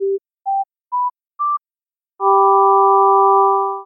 CRUMIERE Robin 2019 2020 GGBDChord
This sound was made on Audacity. I generated 4 different sinusoidal waves to recreate a G major chord :
- 1st wave : 392 Hz, amplitude 0.2
- 2nd wave : 784 Hz, amplitude 0.2
- 3rd wave : 987.8 Hz, amplitude 0.2
- 4th wave : 1174.7 Hz, amplitude 0.2
I cutted every tone so I can heard every single note before the final chord. I added a fade-in and a fade-out effect on every tone to make the sound softer to listen. This is the final chord in G major (G, G, B, D notes).
Gtone, Gmajor, chord, electronic